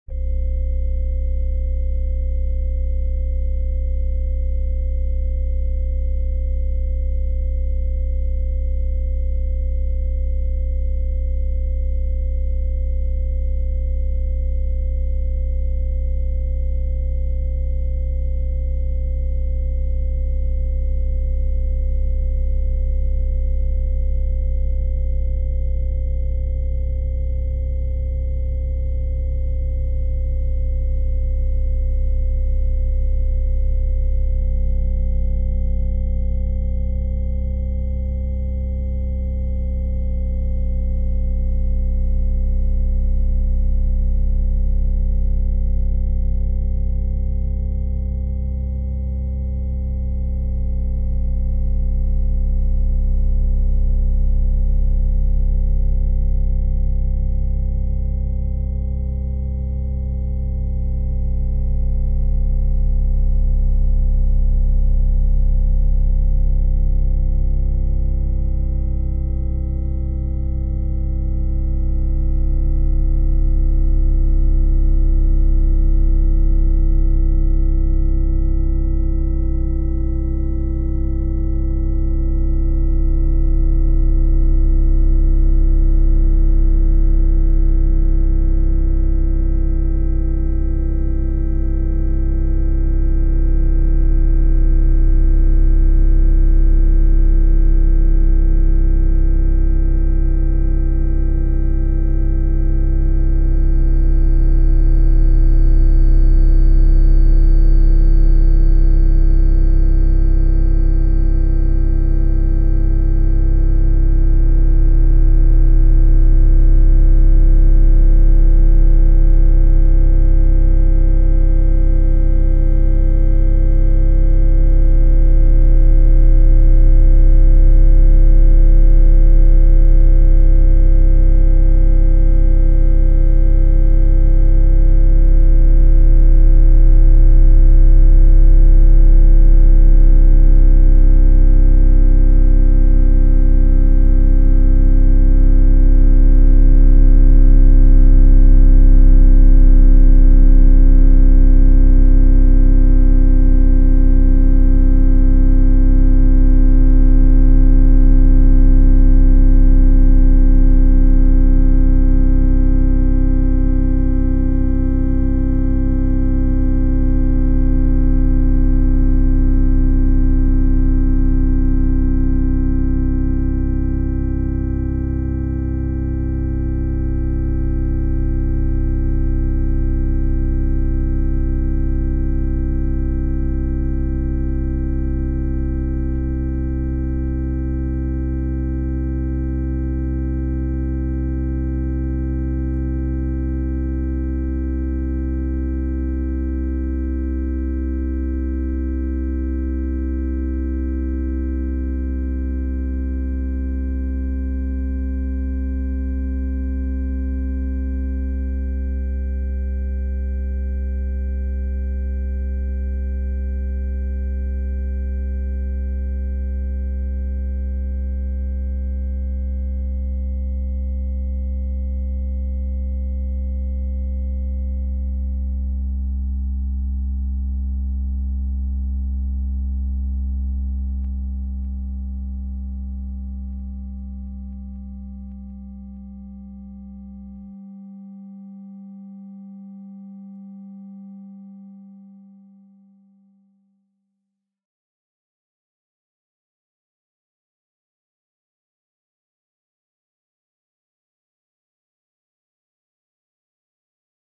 Synth Drone 1
Drone made of mixed up synths created using Reaper DAW for an underscore in a theatrical sound design
electronic, crescendo